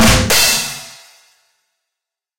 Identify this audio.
Well known drum roll after a joke (like in stand-up comedy). Made with Reason 10.
Comedy, drum-roll, Fanfare, percussion, Rimshot, Schlagzeug, Tusch